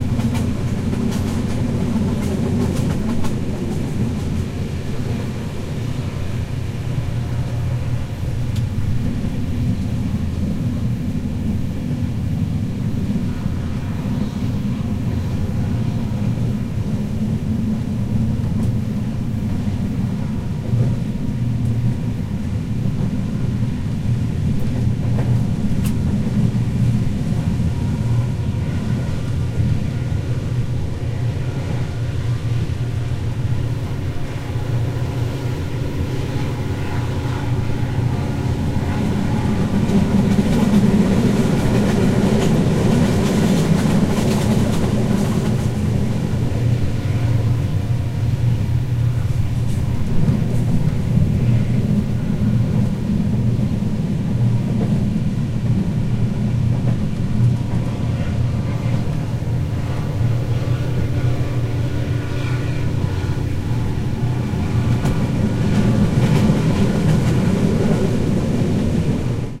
TRAIN INTERION OPEN WINDOW
Sound recording of the interior of a moving train with the compartment window open
rail, railroad